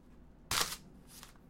37-Crujido Craneo
Head bone broke